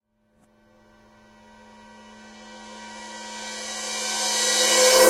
crash1 reverse
crash, cymbal, processed, remix, request, reverse, sfx, whoosh, wierd